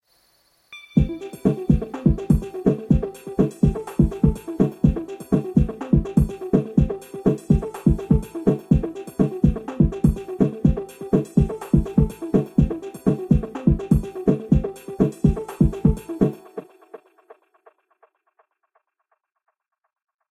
A little Shoetbeat